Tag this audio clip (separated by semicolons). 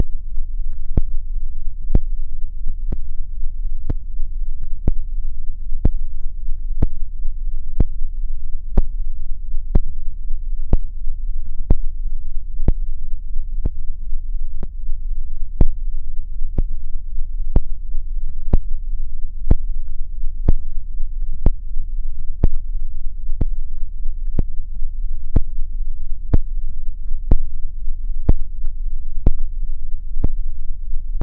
alarm; industrial; loop